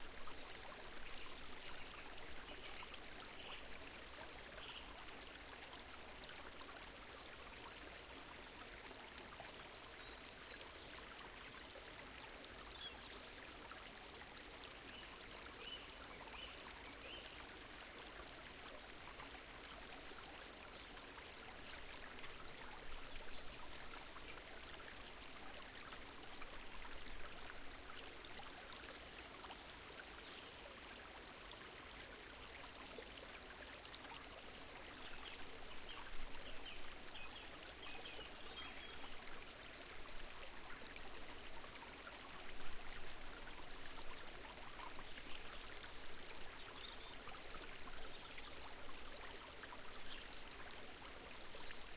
small meandering stream in the woods with birds songs.
recorded with couple of Rode NT5